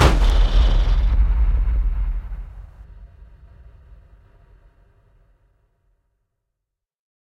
This is a mix of deep boom sounds I mixed together. Probably like 30 or more sounds to get just what I wanted, even my own voice mixed in there somewhere. Figured others might find this useful. This is the version which is pitched even lower then the normal one I made.
Might as well say what I used this for. So in making a big huge video for my family, where I've filmed them doing certain things and not tell them why, to which I'll present the video when we all get together for Christmas eve, 2019. Theres also a part where I hosted a picnic, filmed everyone, and then asked them to karate chop or kick towards the camera. The next day I went with a crew and filmed ninjas around the park. Edited together with the family attacking the ninjas then the ninjas falling back and exploding with a particle effect on After Effects. This is an edited version from the main sound heard when that happens. I made a few others using the base sound.